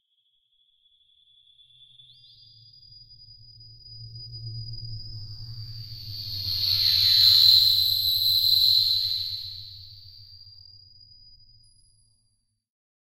sci fi
A strange spacy sci-fi sort of sound - part of my Strange and Sci-fi 2 pack which aims to provide sounds for use as backgrounds to music, film, animation, or even games
ambience, atmosphere, boom, cinematic, city, dark, drum, electro, music, percussion, processed, rumble, sci-fi, space, synth